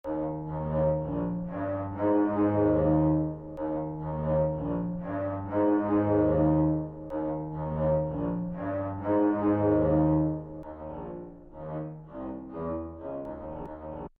Front Line
dark, string, pad, battle